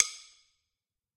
Drum stick Hit Garage [RAW]
drum-stick, hit, oneshot, percussion, raw, recording, sticks, wood, wooden